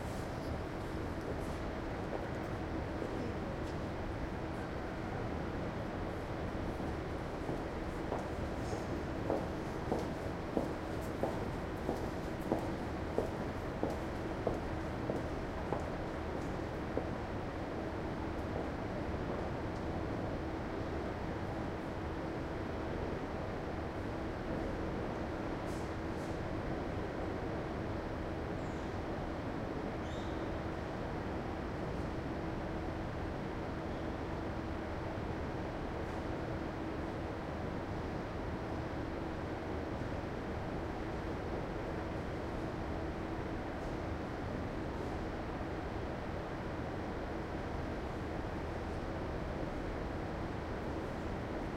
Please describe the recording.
ambient recording of the upstairs lobby of a parking garage in the city center of leipzig/germany. footsteps of a woman in high heels crossing the floor.this file is part of the sample pack "garage"recording was done with a zoom h2 using the internal mics with a 90° angle.